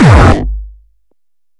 A short Bass , Its hard , and its good for Hardcore Tracks
bass, hard, hardcore, loud, noize, sick, terror